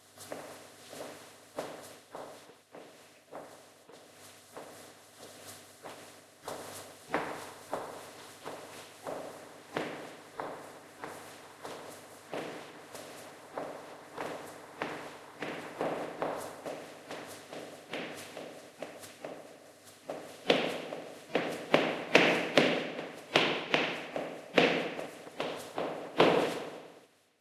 Steps in Empty Room on Concrete
Varying speed of footsteps on concrete in an empty room.
Room, Feet